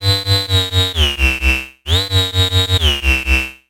High Resonance Bass Pattern 2
130bpm, dark-bass, bassline, ni-massive, bass-synthesis, sub, funky, wobble, bass